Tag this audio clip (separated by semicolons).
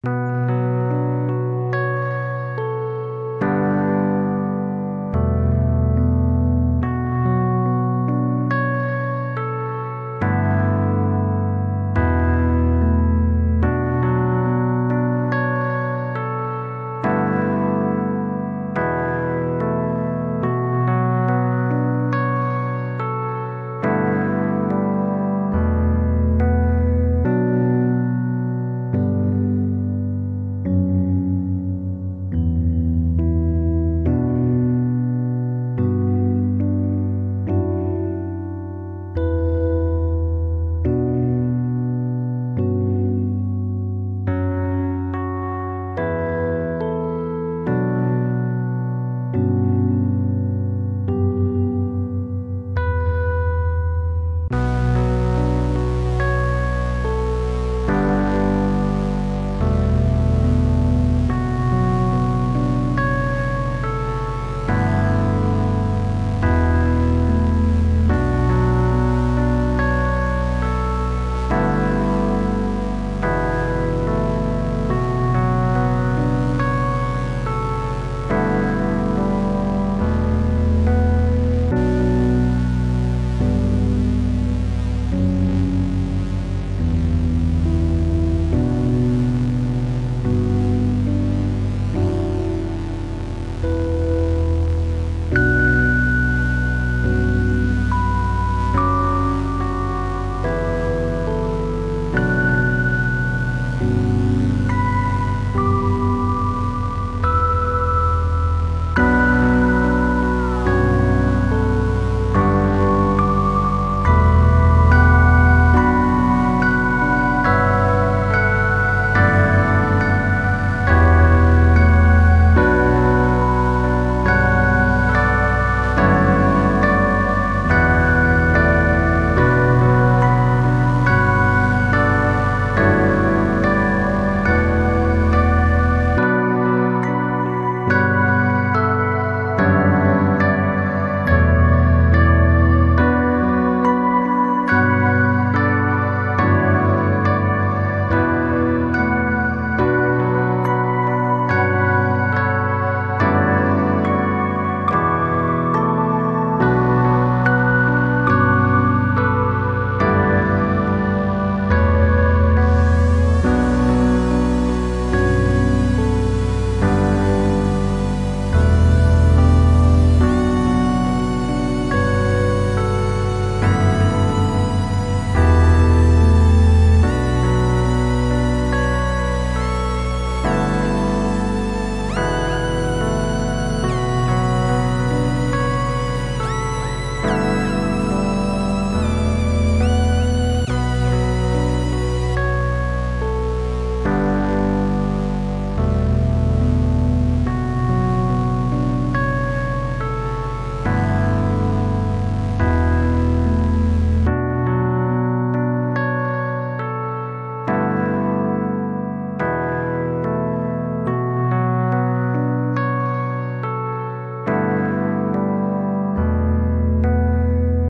analog; arturia; dark; electro; electronic; evolving; experimental; hardware; improvised; keys; korg; live; lofi; loop; oregon; portland; psychedelic; synth